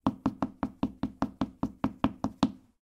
A sneaker-clad foot tapping on a tile floor. I recorded this for a project and am releasing it to help others, since I found a lack of toe tapping sounds here.